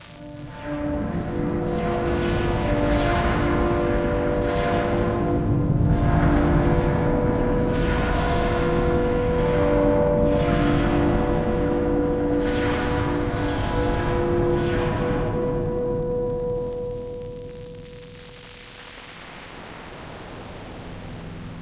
Phased Harmonics, background noise
Lots of background noise giving way to swirling mid-tones drowned in reverb. These fade into noise again at the conclusion. This sound was generated by heavily processing various Pandora PX-5 effects when played through an Epiphone Les Paul Custom and recorded directly into an Audigy 2ZS.
noise, phasing